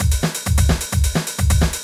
punkrock beat 130 bpm
loop, Maschine, 130, drumloop, beat, bpm